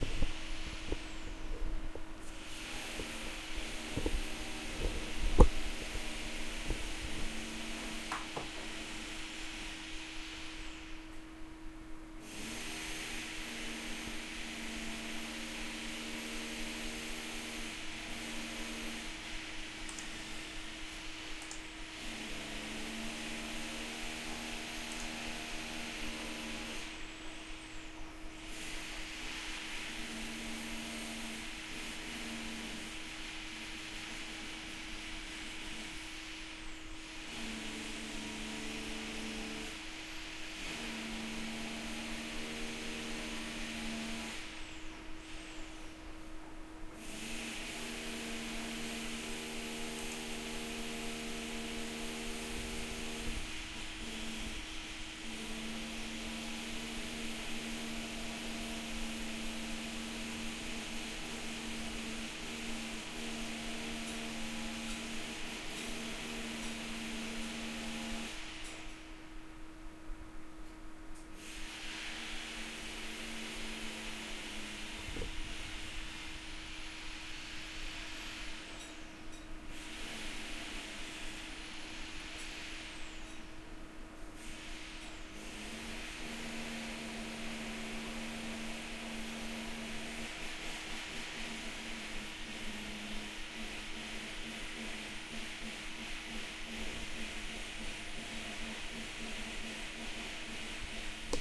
Few month ago neighbor make a renovation.